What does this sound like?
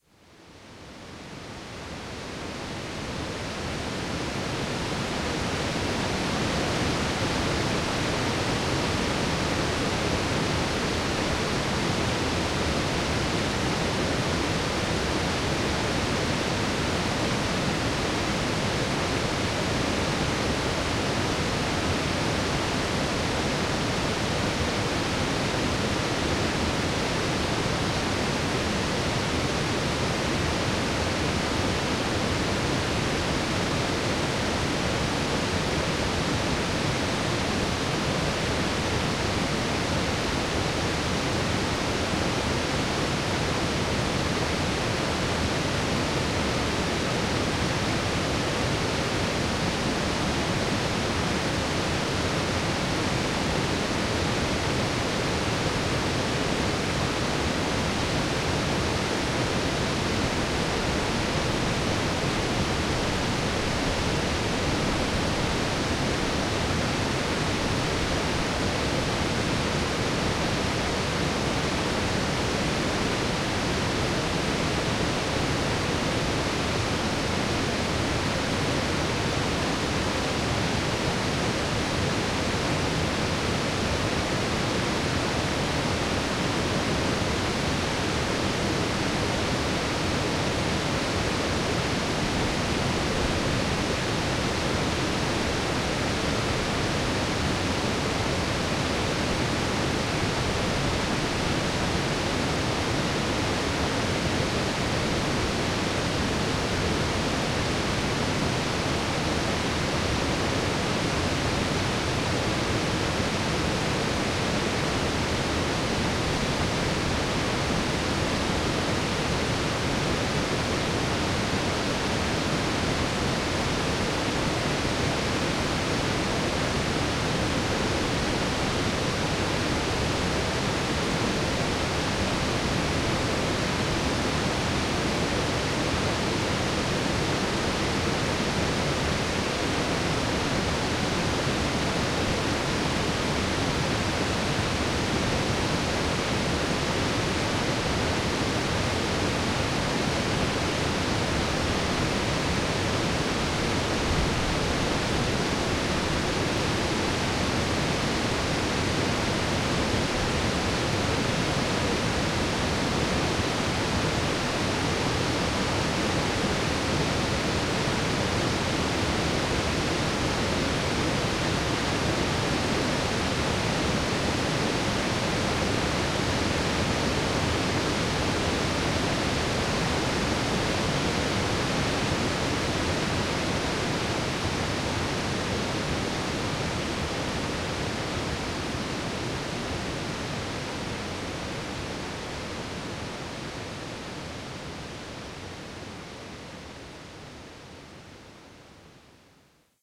08.River-Foyers-Rapids
Recording of the rapids on Foyers River.
field-recording, rapids, river